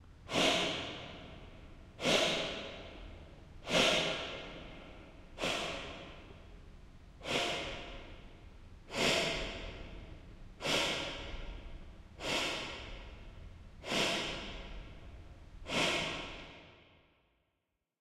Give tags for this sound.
broom
brush
film
mat
OWI

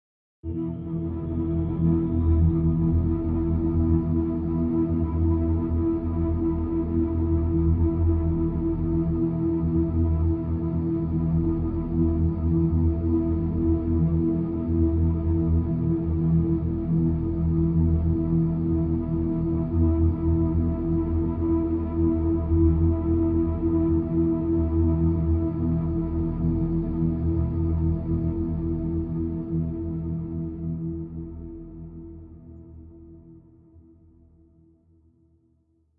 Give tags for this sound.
abstract
atmosphere
cinematic
dark
drone
electro
electronic
horror
lo-fi
noise
pad
processed
sci-fi
soundscape
suspence
synth

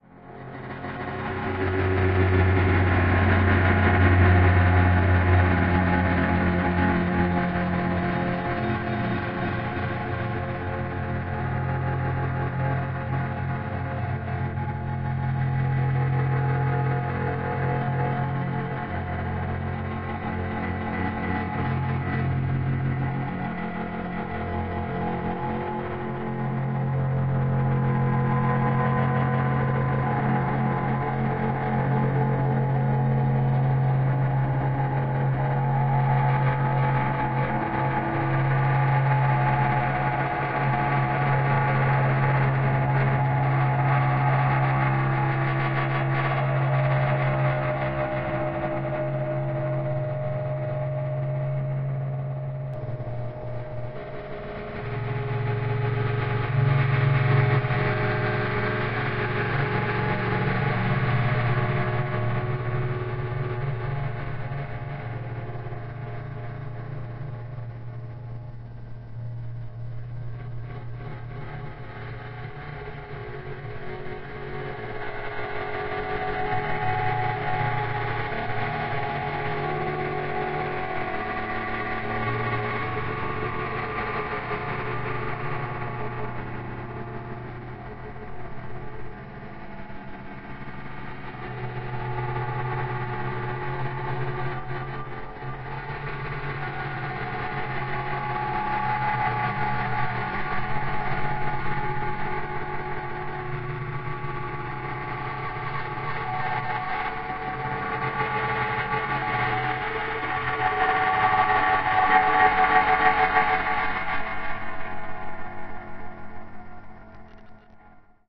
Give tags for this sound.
processed
resonant

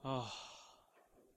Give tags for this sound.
vocal
foley
sigh

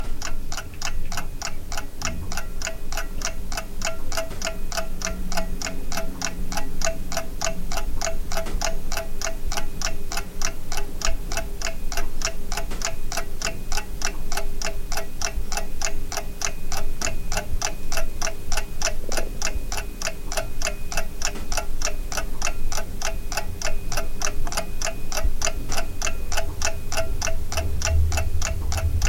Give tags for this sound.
tone
room
ambient
tickling
clock

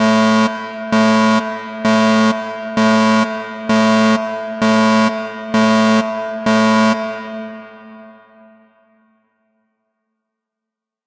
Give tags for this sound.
fire
bridge
hover
fx
future
warning
alien
noise
fiction
weird
futuristic
spaceship
digital
electronic
alert
space
alarm
atmosphere
science
engine
sound-design
energy
starship
sci-fi
emergency

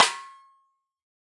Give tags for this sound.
1-shot
drum
multisample
snare
velocity

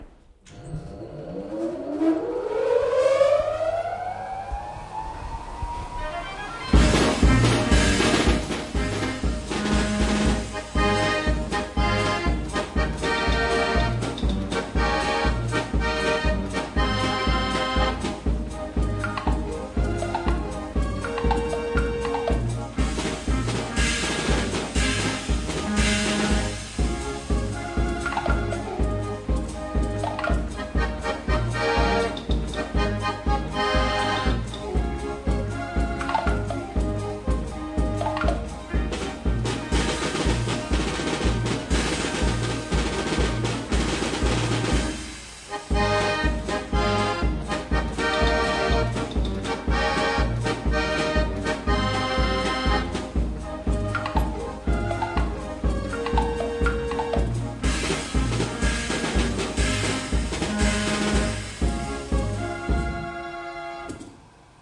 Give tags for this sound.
dancing-bear
historic-music-machine
museum
tanzb

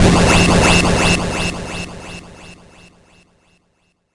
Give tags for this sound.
digital
fx
sound-design
fxs
freaky
future
robotic
lo-fi
computer
electric
sound-effect

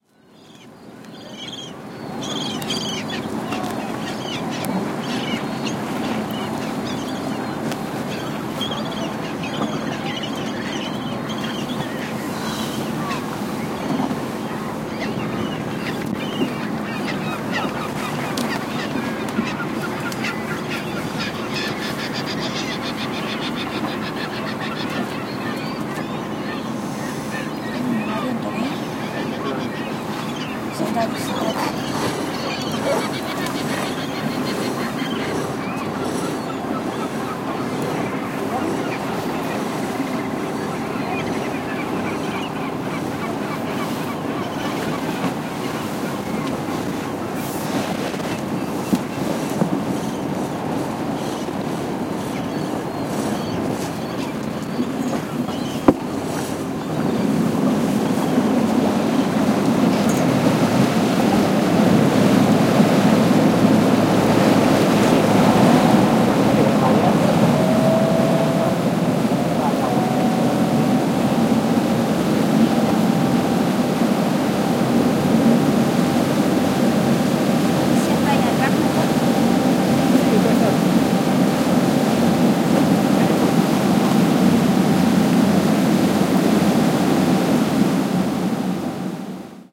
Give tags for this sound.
ambiance
birds
boat
engine
field-recording
harbor
motor
port
screechings
seagulls

